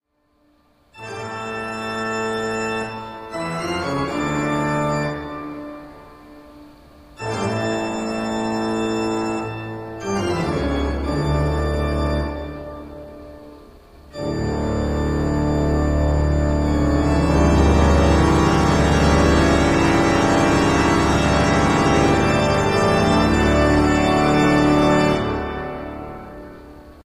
OPENING - J S Bach-Toccata and Fugue_C MINOR